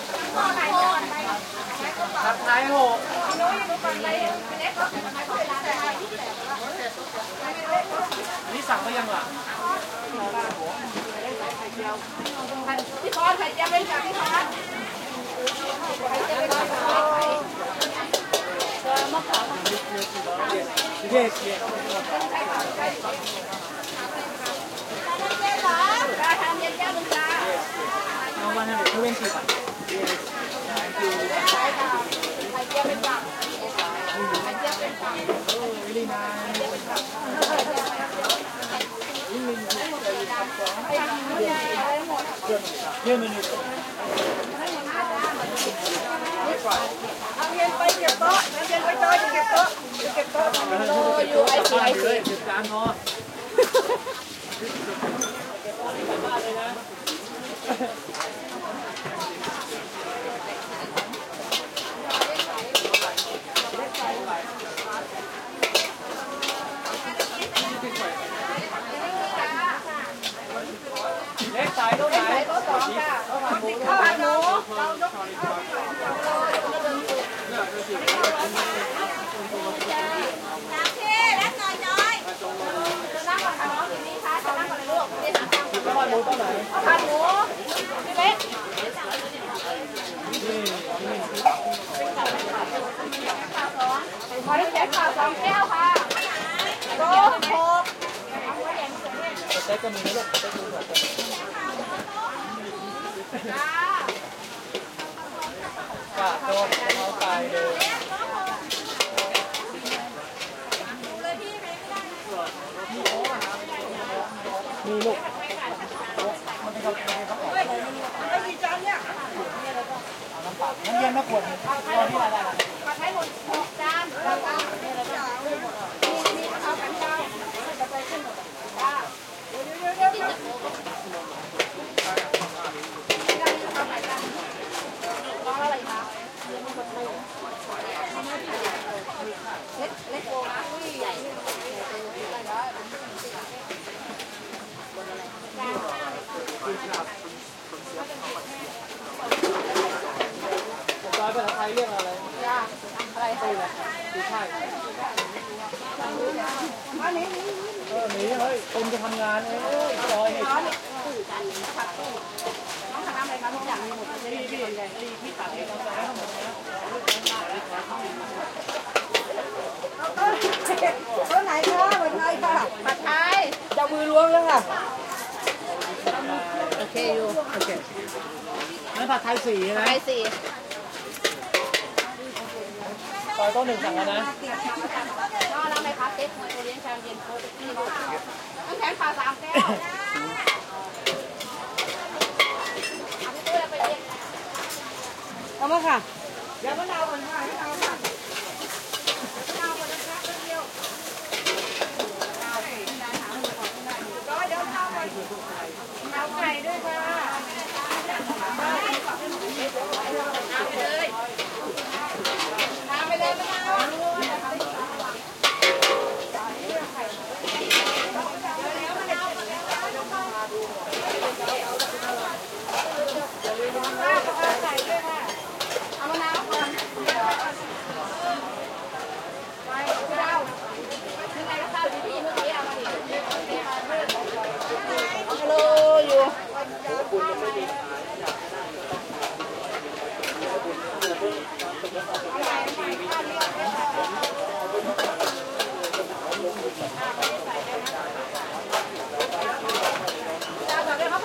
Thailand Bangkok, Chatuchak market busy pad thai stand small tent outside voices and cooking in wok
Bangkok, pad, field-recording, stand, Thailand, busy, thai, Chatuchak, market